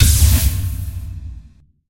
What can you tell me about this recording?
ARI Scan Mixdown
my own Heavy Rain ARI Scan Sound
redo, sound, Rain